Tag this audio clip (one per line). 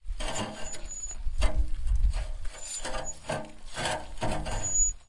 CZ
Czech
Pansk
Panska